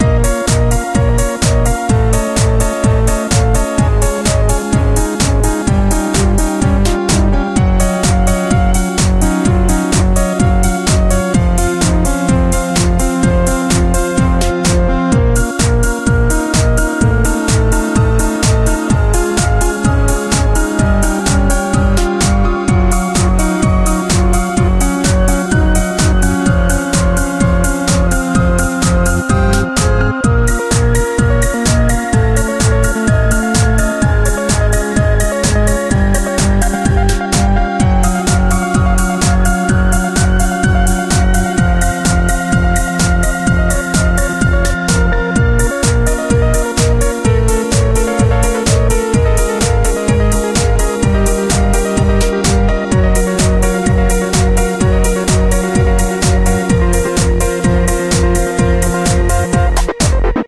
Loop Computer Feeling Good 07
A music loop to be used in fast paced games with tons of action for creating an adrenaline rush and somewhat adaptive musical experience.
game, loop, videogame, Video-Game, gamedeveloping, victory, games, indiegamedev, music-loop, videogames, gaming, gamedev, indiedev, war, music, battle